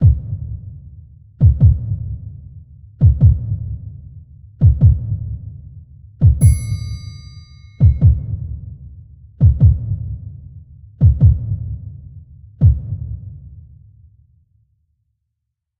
Eerie Slow Motion Effect
A small music sample designed to create an eerie slow-motion effect. It can be looped but has a blank space at the end that would need to be cut off; you can request for me to do it and upload as a separate sound. Created on the music-making program "Musescore"; contains bass drums and triangle.
creepy, music-samples, eerie, horror, slow-motion, scary